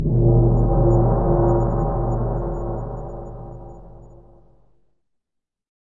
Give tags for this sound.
anti-Shinto crash filmscore single-impact synth

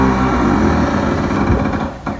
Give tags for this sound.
close creak creaking creaky door gate haunted horror open ship squeak squeaking squeaky wood wooden

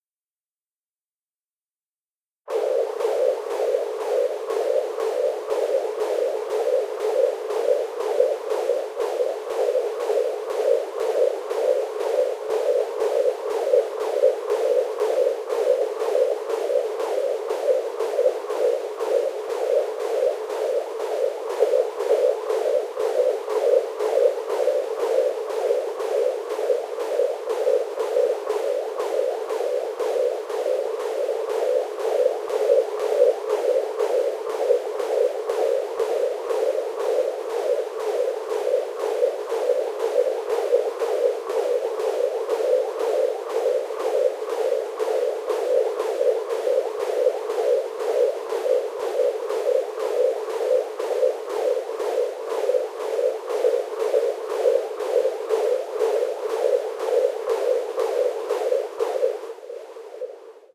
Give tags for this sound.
Effect Audacity Noise Basic

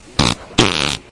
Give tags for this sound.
fart poot gas weird flatulation flatulence explosion noise

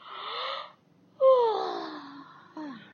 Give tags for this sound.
female voice

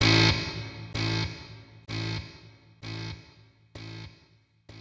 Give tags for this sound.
drums free sounds

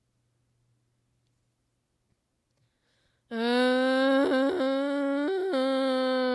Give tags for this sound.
brains dead zombie

